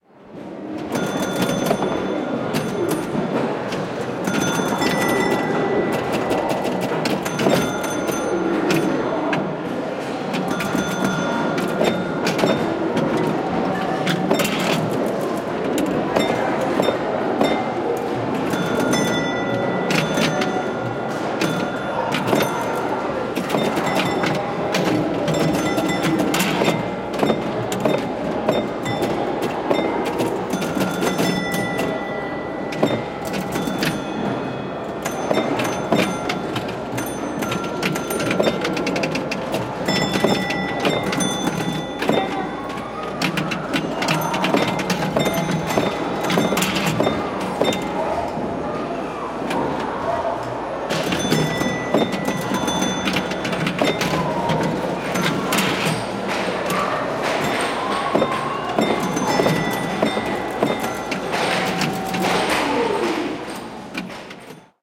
MM Project -10 Pinball
Musée Mécanique recording project - 10 Pinball
Pinball machines;
Fun Land from Gottlieb, 1968
Sing Along from Gottlieb, 1967
Olympics from Gottlieb, 1962
ball, Fun-Land, Gottlieb, machine, vintage, score, historic, silver, bumper, San-Francisco, Musee-Mecanique, arcade, Sing-Along, field-recording, old, Olympics, flipper, amusement, tilt, Fishermans